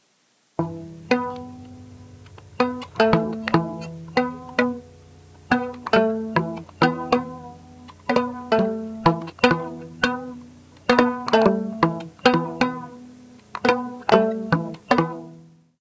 Plucked Violin sequence from a loop
Taken from part of a looped piece I did last night via my iPad and a looper app, TF Looper. I played this on electric violin plugged in through an iRIg interface. The section was then pitched down in the app.